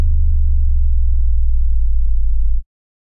Sub Rush 4
big, boom, cinematic, dark, design, low, rush, sample, sound, sub, tuned, wobble